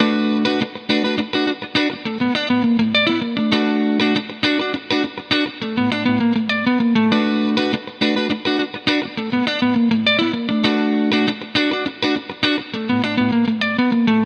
funk to hunk
funk funk funk. I love funk :-)
If you use this riff please write me as a author of this sample. Thanks 101bpm
yo! yo! yo! yo!
riff, funk, yo, guitar